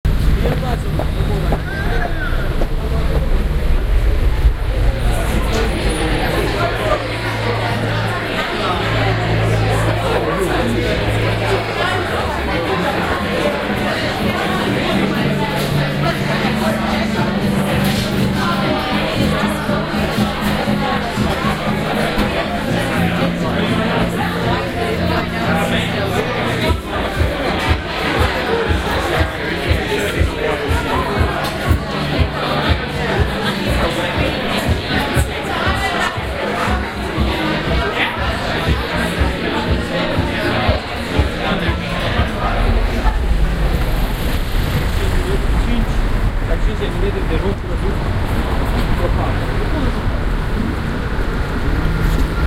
Great Portland St - Albany Pub
ambiance, ambience, ambient, atmosphere, background-sound, city, field-recording, general-noise, london, soundscape